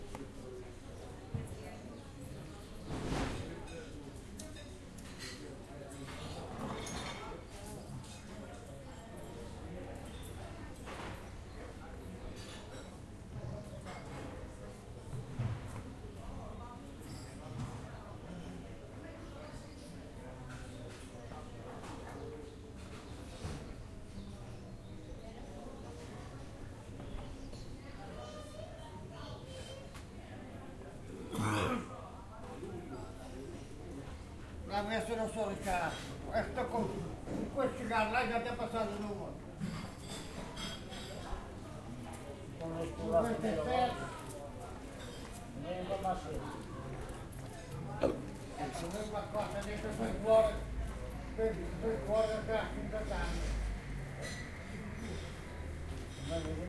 STE-019-lisbon alfama05
The ambience of the Alfama district in Lisbon.
city, soundscape, street, field-recording, portuguese, lisbon, voices